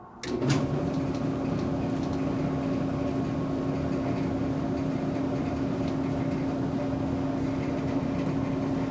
turning on a dryer